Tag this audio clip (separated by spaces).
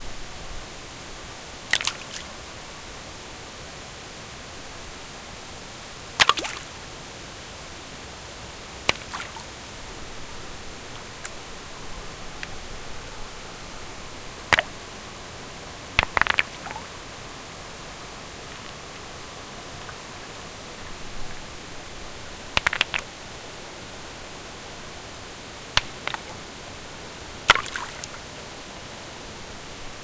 stone quarry waterfall near rocks falling smash